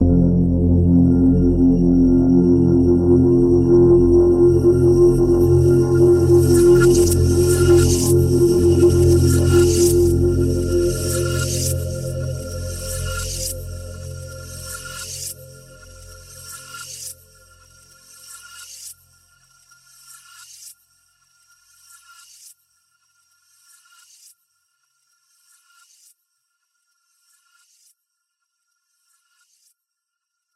A very dark and brooding multi-sampled synth pad. Evolving and spacey. Each file is named with the root note you should use in a sampler.
dark, multi-sample, multisample, ambient, granular